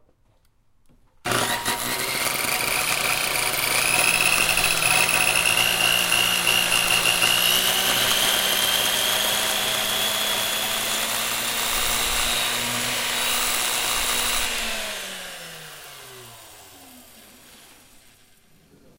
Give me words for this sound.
Industrial Pannel Saw
Stereo
I captured it during my time at a lumber yard.
Zoom H4N built in microphone.